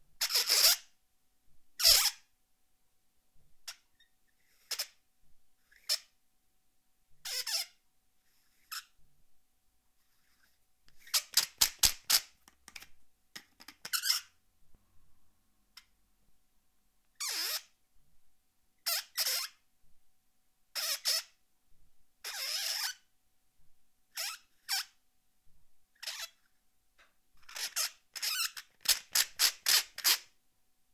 Uncut takes of multiple squeaks from my microphone protective tube. There's some different sounding squeaks in this lot which I didn't edit down into shorter files; not quite what I needed at the time.
Recorded with NTG-3, SQN 4s, Zoom H4.